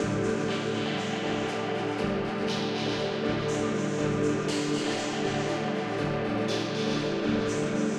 120 Bold plucks in fume
a processed rhythm loop accident